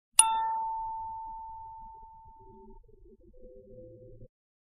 A vintage carillon sample played with a manual lever.
metallic, steel, carillon, sad, sample, baby, mechanic, horror, iron, vintage, high